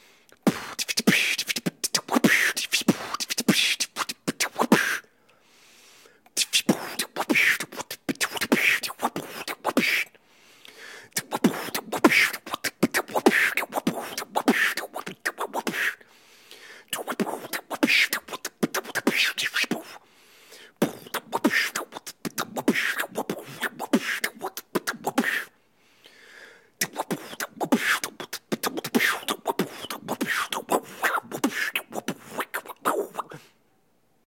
Beatboxing beats and SFX to pull from - all done with my vocals, no processing.
bass, beat, beatbox, beatboxing, chanting, detroit, drum, drumset, echo, fast, hi-hat, hiphop, human, loop, looping, loops, male, man, SFX, snare, strange, tribal, tribalchanting, weird